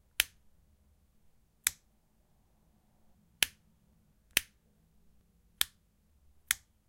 recording the switches sound
click, hi-tech, button, switch, ambient, press